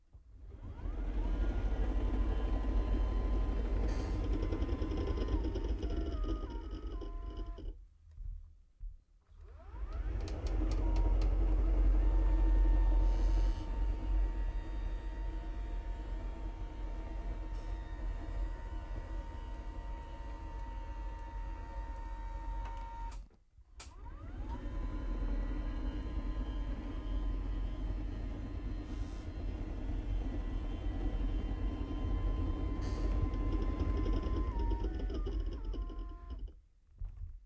Hydraulic of wheelchair